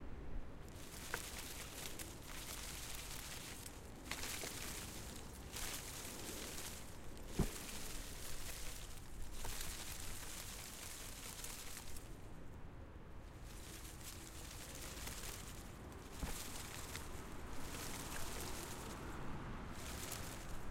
Sonido de ramas de arbol siendo agitadas
Ambiente, Arbol, Naturaleza